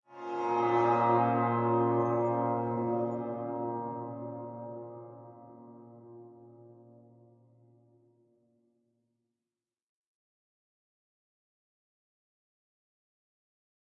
Bowed electic guitar - Bbm chord (3)
Electric guitar played with a violin bow playing a Bbm chord
guitar, huge, electric, reverb, spacey, soundscape, orchestral, violin, bowed, chord, string, bow